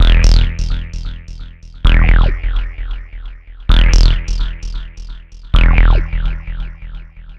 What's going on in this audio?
Krucifix Productions they are coming
films, movies, film, music, suspence, sounds, soundesign, soundtrack, spooky, movie